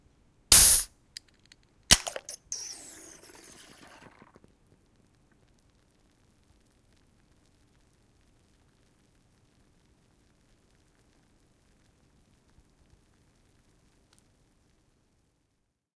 Open-Beer can 090906
Opening a can of Guiness. Ahhhh. Tascam DR-100.
beer, fizz, fuzz